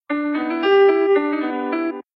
A country Type sound recorded by me on a piano recorded at 113bpm.
country, piano